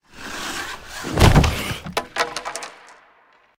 hockey outdoor player checked into boards +stick fall